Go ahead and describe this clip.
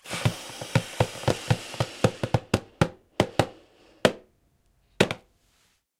Balloon-Inflate-07-Strain
Balloon inflating while straining it. Recorded with Zoom H4
balloon, inflate, strain